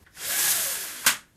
window-blinds-lower01
Lowering window blinds.
bedroom, blinds, close, closes, closing, curtain, discordant, door, open, opened, opening, opens, portal, slide, sliding, squeak, squeaky, squeeky, swipe, window, wooden